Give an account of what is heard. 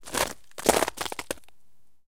footsteps - ice 04
Walking on a pile of ice cubes while wearing mud boots.
feet, crunch, walking, crunchy